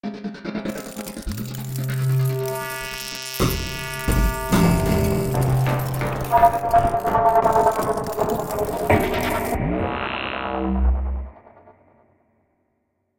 Another attempt at making the sound of a transformer, with the elements rearranged into a (hopefully) more logical order.